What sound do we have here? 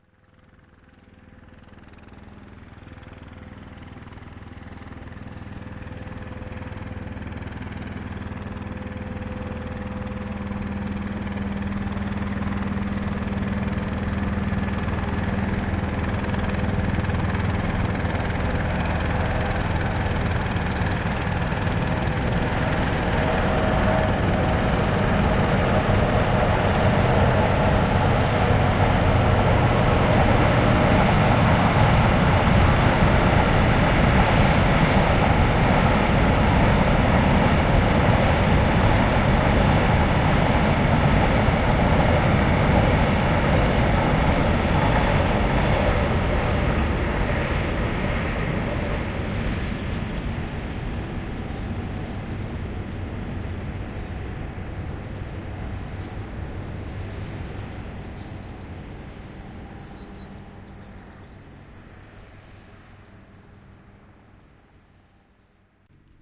train boat
A river boat passes from left to right on the river Aire, England, March 8th 2007. As the boat approaches a train passes over a railway bridge some 200 yards away. As the noise of the boat and train subsides the sound of water and birds takes over. Minidisc recording.
noise, atmosphere, boat, train, field-recording, water, ambience, river, bird